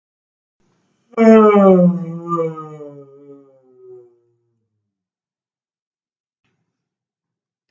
yawning or sleepiness sound captured using Cyberlink PowerDirector 14
bored, Sleep, Sleepiness, tired, yawning